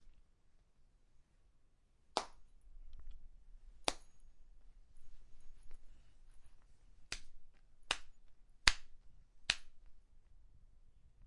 Hand Slapping skin. Light Slap
OWI, Foley, Slap, Dry